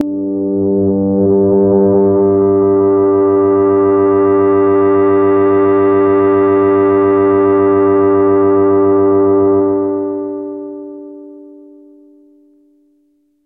Super FM Pad G2
An evolving pad type sound created on a Nord Modular synth using FM synthesis and strange envelope shapes. Each file ends in the note name so that it is easy to load into your favorite sampler.
digital, drone, evolving, fm, multi-sample, multisample, nord, note, pad, sound-design